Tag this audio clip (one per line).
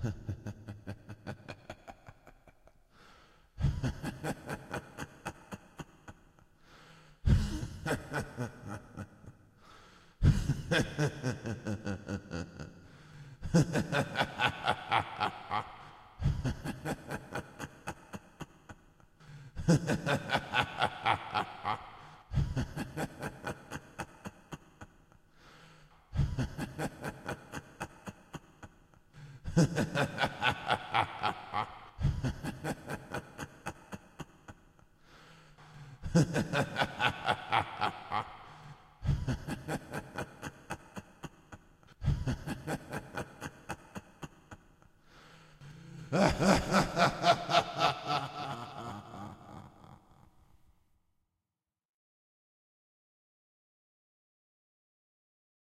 psychotic
laugh